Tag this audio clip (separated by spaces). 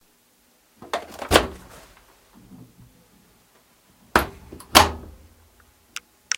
close closing door dryer drying open opening shut shutting washer washing